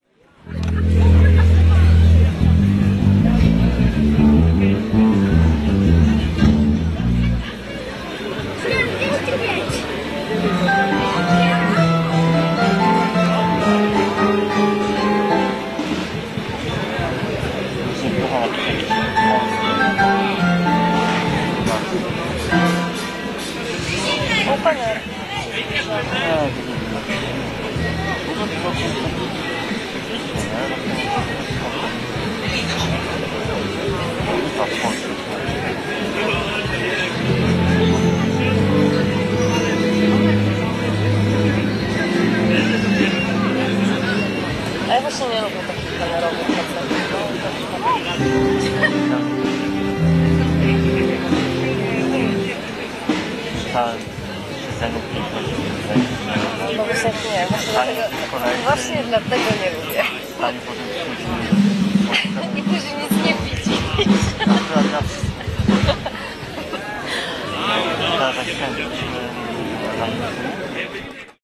day of strzalkowo sound trest before key performance270610
27.06.2010: the Day of Strzalkowo village (village in Wielkopolska region in Poland). I was there because I conduct the ethnographic-journalist research about cultural activity for Ministerstwo Kultury i Dziedzictwa Narodowego (Polish Ministry of Culture and National Heritage). the Day of Strzalkowo is an annual fair but this year it was connected with two anniversaries (anniv. of local collective bank and local self-government).
the sound test before the key performance of famous Polish singer Maryla Rodowicz.